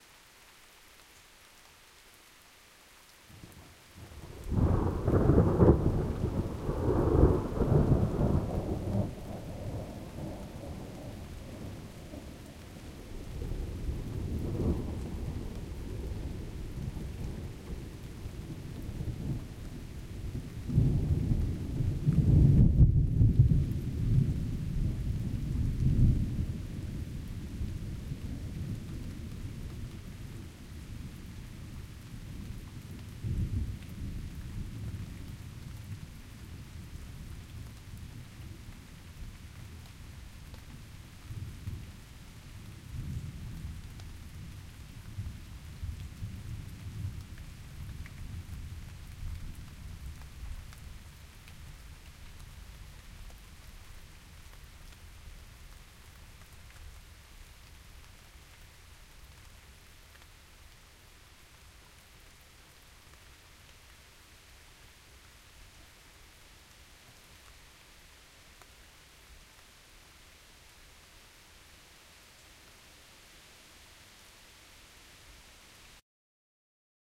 This is a field recording, done using a ZOOM H6 with attached stereo mic.
The limiter (general type) was activated on the channel.
This is the raw recording without any EQ or dynamics added. A quick normalization gave a nice, rich signal that you might be able to use for any purpose. Apply a low pass filter to cut out the rain. Well, enjoy.
More of this will be uploaded.
thunder, rain, nature, lightning, thunderstorm, field-recording, thunderclap